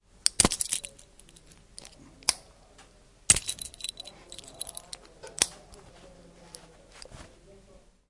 mySound MB Thibeau
belgium, cityrings, mobi, thibeau